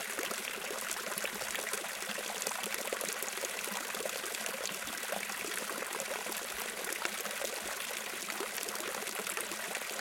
Water Stream - Small Fountain - Close Recording (loop)
Gear : Zoom H5